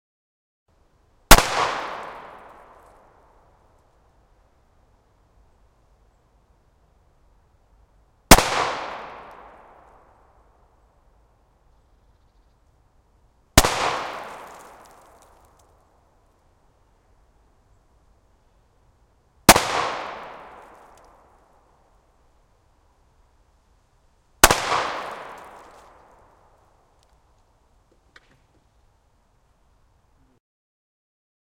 gun 9mm 25m 180 off axis debris m10
Field-recording of a 9mm pistol at 25 meter distance 180 degrees off axis on a shooting range using a Sony PCM m-10.
9mm, far-distance, gunfire-tail, gunshot, gunshot-echo, gunshot-reverb, outdoor, pistol, shooting-range, sony-pcm-m10, weapon